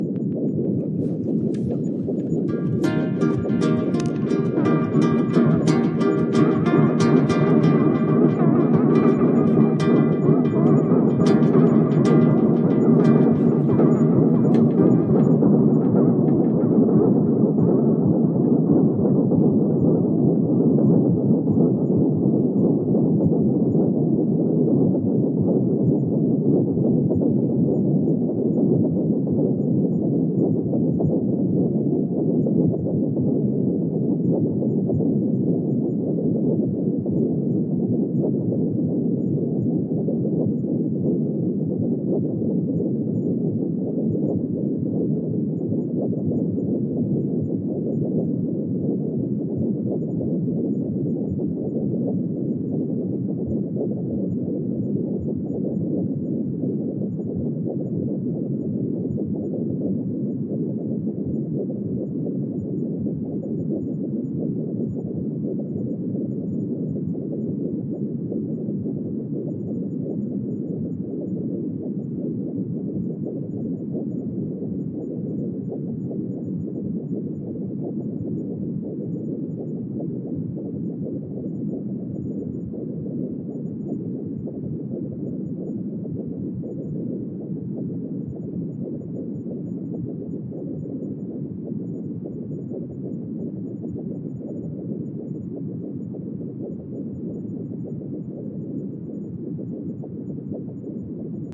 Mandola Improv 1
A mandola improv for a language in Rivers of the Mind, this one used a weird wobbly delay effect so it ends with a strange underwater type of sound. Not sure of the key.
wobble, delay, mandola, noise, improv, underwater